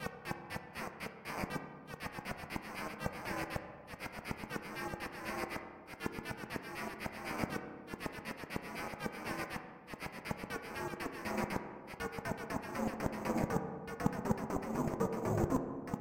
A strange rhythmic sound. I have not saved any presets or made any records. I do not remember how the sound was created. I think it was most likely made in Ableton Live.
This pack contains various similar sounds created during the same session.
strange, delay, synthesized, rhythmic, synthetic, echo